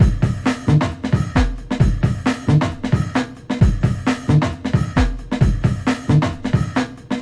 1 drumloop :) 133 bpm